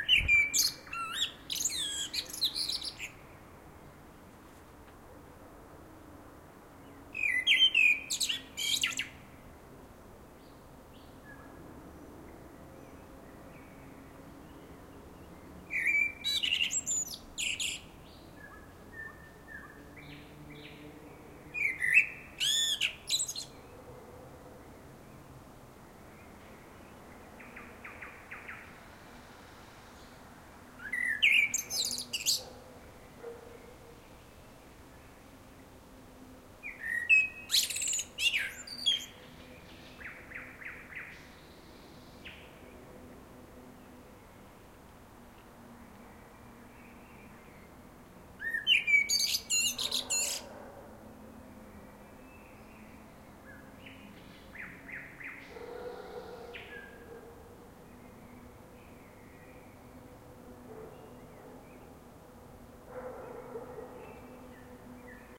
Bird singing close in city garden
Bird singing recorded on the balcony close to the singing bird. Some city sounds in the background.
loud, summer, urban, close, bird, sing, city, dogs